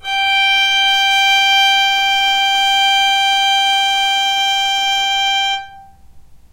violin arco non vibrato